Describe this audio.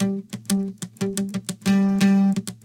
Sympatheque Guit B 5
jazz, music, jazzy
jazz, jazzy, music